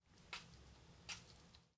26) Soft stabs
foley for my final assignment, stabbed a tomato softly
knife, stab